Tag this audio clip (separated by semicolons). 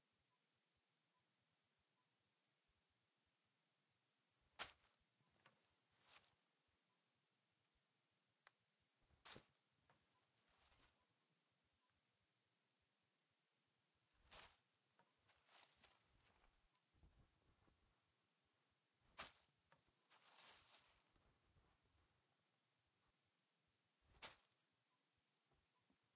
Foley; Clothing; Clean